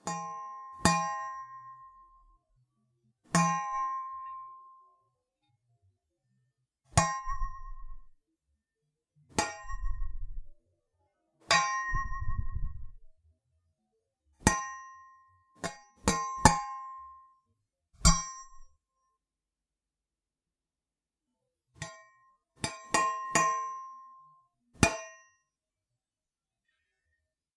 Hitting a metal spatula in various ways to create a couple of different sounds.